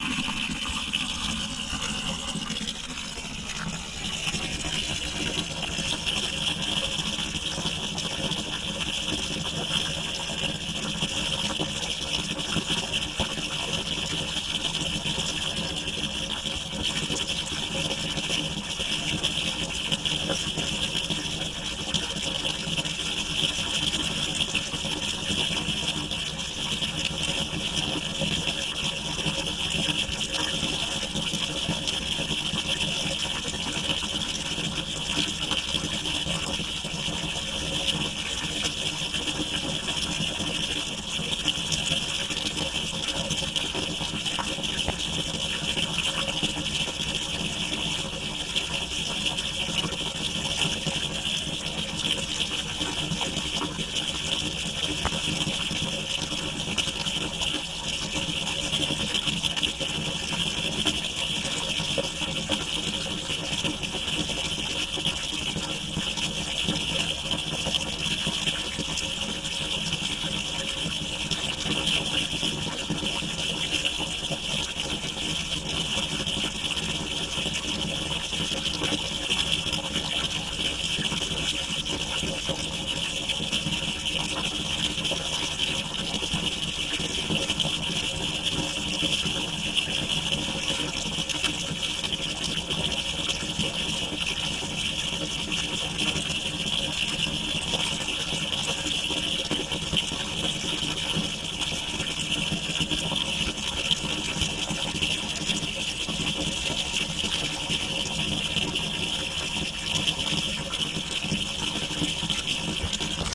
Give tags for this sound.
ambient; field-recording; movie-sound; pipe; sound-effect; water; water-spring; water-tank

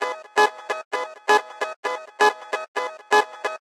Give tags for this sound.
loop; melody

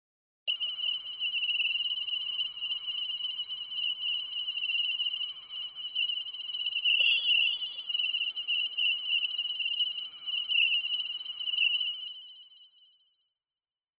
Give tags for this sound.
buzz; drone; electronic; for-animation; insects; surrealistic

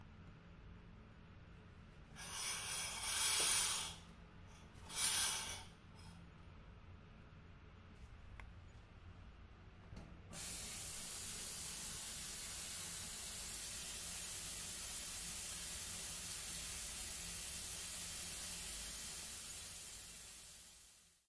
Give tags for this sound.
dormitory; bathroom; water; aip09

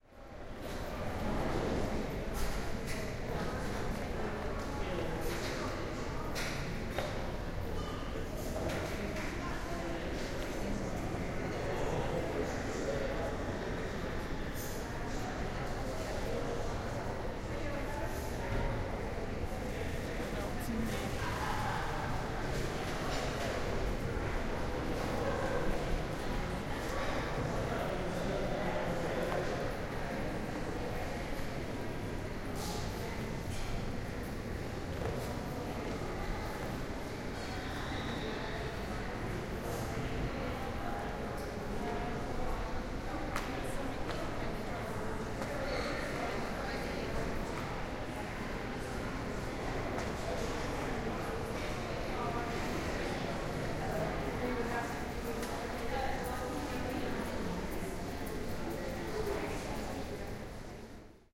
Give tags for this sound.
ambiance; field-recording; reverberant

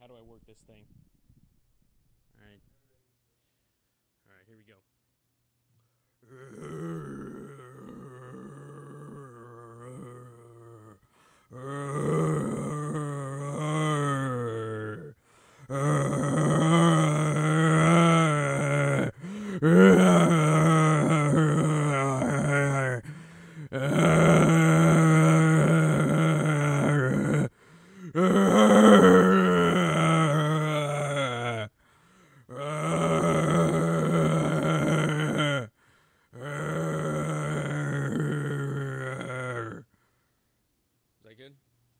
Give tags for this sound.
dead; brains; zombie

